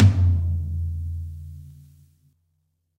Middle Tom Of God Wet 010
drum, drumset, kit, middle, pack, realistic, set, tom